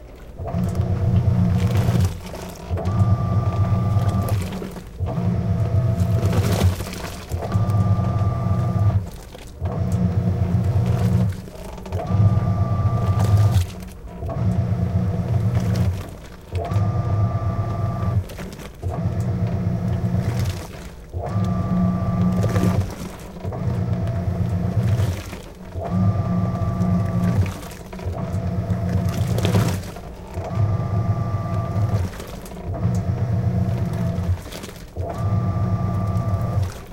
I stuck my H2 almost inside the clothes washer during the wash cycle. Soapy suds, water sloshing, motor flexing, plastic creaking.